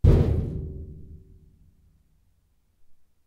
Tom made of metal scraps.